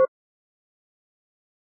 1 beep. Model 2